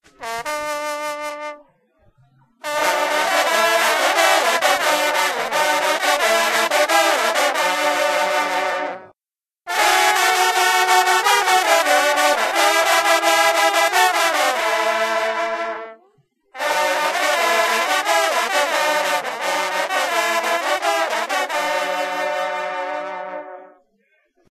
Hunting horn players recorded at a dog and hunting festival in La Chatre (France)
hunting, berry, tradition